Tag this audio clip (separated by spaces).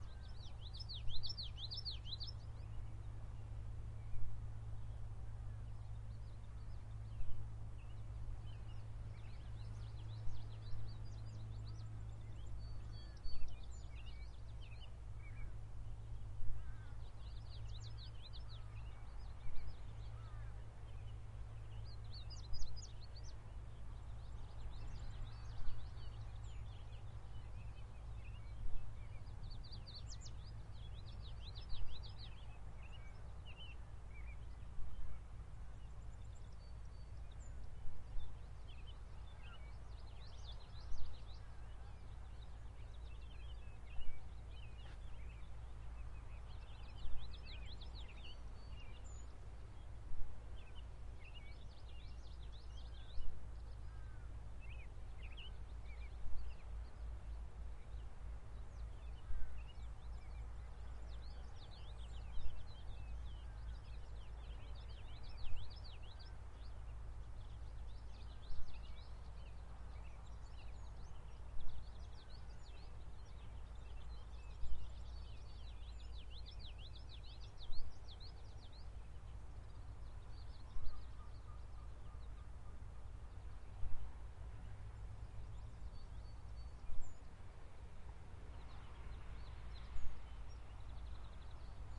island insects birds nature ambient maine h4n ocean